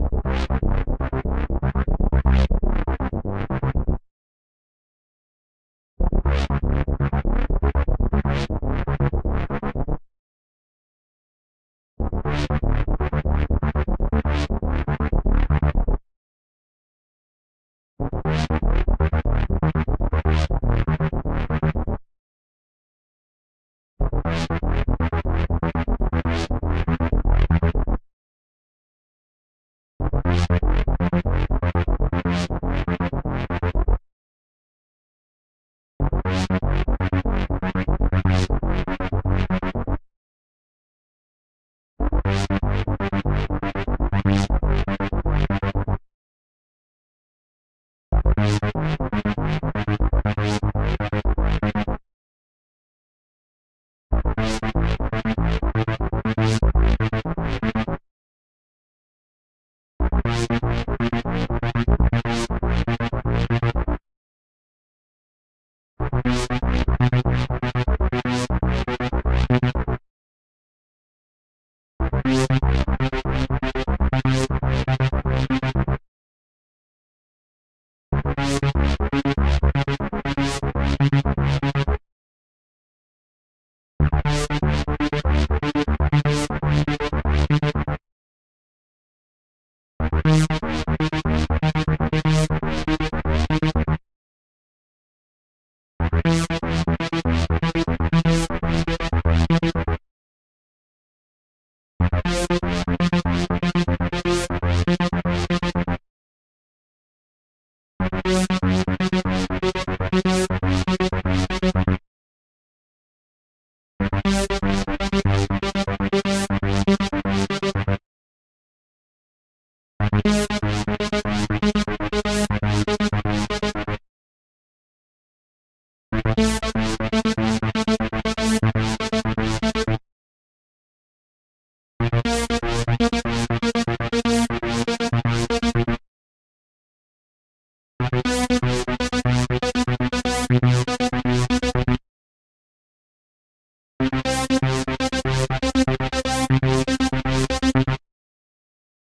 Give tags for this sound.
dance
techno
club
trance
loop
bassline
acid
bass
effect
dub-step
synthesis
electro
bounce
analog
house
ambient
synth
wobble
sound
electronic

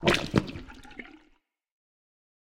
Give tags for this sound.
Impact
Splash
Wet
Puddle
Muffled
Water

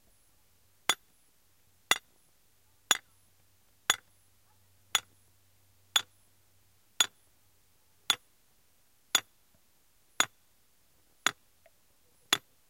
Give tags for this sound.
sledge
pound
hammer
stake
wham
whack
ground
metal

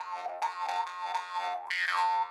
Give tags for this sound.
harp
jaw
khomus
vargan

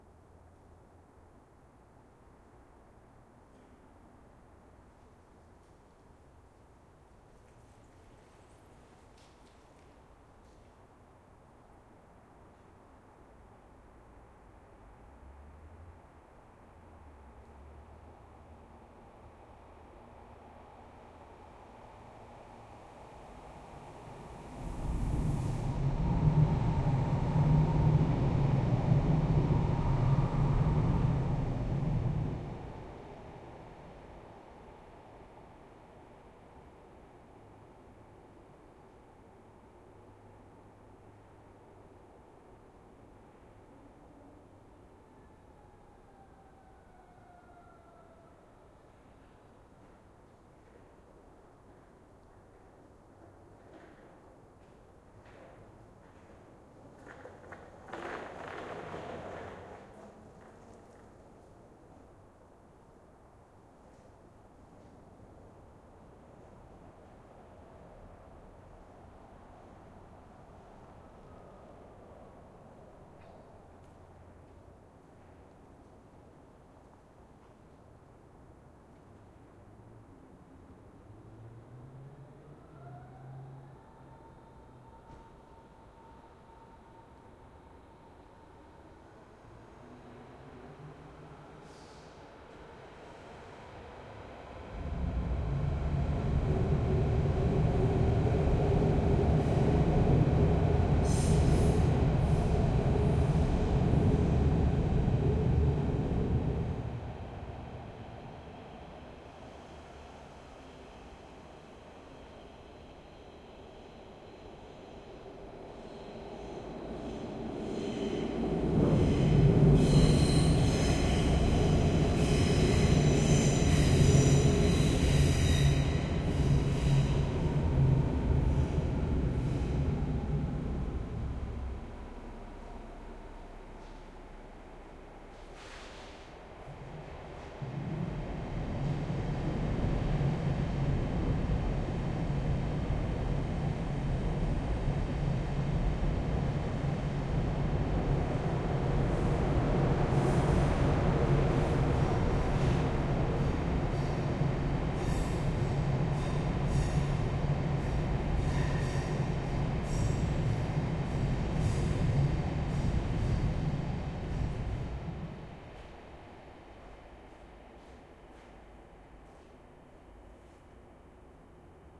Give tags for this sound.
rumbling
rail
railway
S-Bahn
Berlin
vibrations
rail-way
train
trains
rumble
rail-road
bridge

